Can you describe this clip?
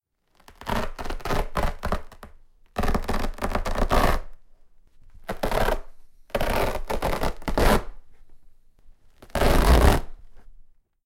Tearing, Carpet, A
Raw audio of tearing up some old, thick carpet.
An example of how you might credit is by putting this in the description/credits:
The sound was recorded using a "H1 Zoom V2 recorder" on 8th February 2016.
carpet, tear, tearing